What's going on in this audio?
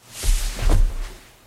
body falling on rug
Body collapsing body on rug. You can hear my feet slide off of lament flooring.
collapsing, floor, body, falling